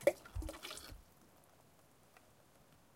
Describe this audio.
Tossing rocks into a high mountain lake.
bloop, percussion, splash, splashing, water